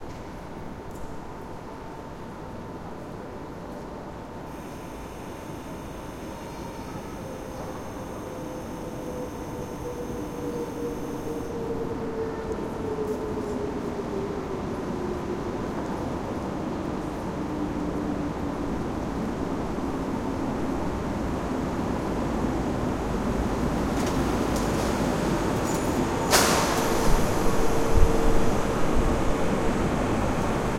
Train-arriving 090705
Stereo recording. Incoming train recorded at the trainstation in Bergen, Norway. Tascam DR-100.
ambience, train, train-station, public-space, field-recording